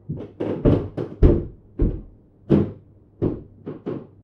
The sound of someone walking on a slightly creaky floor, one floor up.
Recorded on a Zoom iQ7, then mixed to mono.